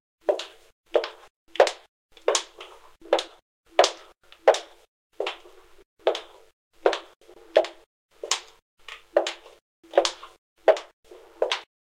Streety«s sister footsteps
high-heels, sister, footsteps, environmental-sounds-research, denoised, streety